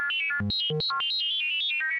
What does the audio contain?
SCIAlrm 8 bit random melodic robot
8-bit similar sounds generated on Pro Tools from a sawtooth wave signal modulated with some plug-ins
synth, alert, spaceship, 8bit, computer, scifi, robot, alarm, beep